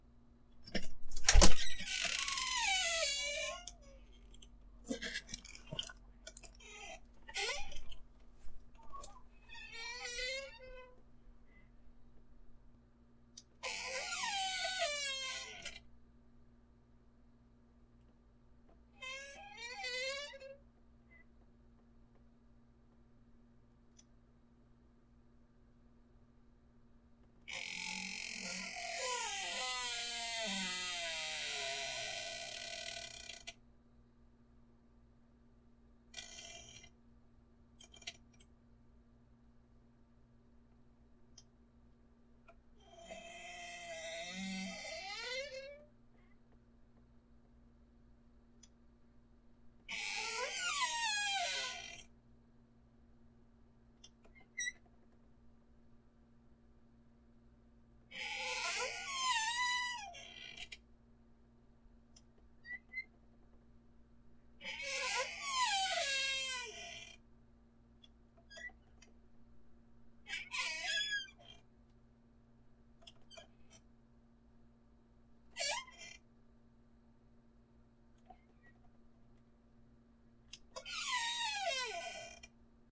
MyFreeSqueakyDoor NathanTarantla
My recording of a creaky door in my house before I put some oil on the hinge I've used in my audiobooks and productions. Enjoy!
squeaking, door, creak, squeaky, squeak, creaking, hinge, creaky, hinges, wood